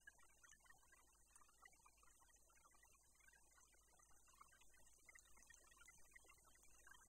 A relaxing recording of water recording using a DIY piezo transducer Mic. Samples were recorded by plugging the Hydrophone into a Zoom H1.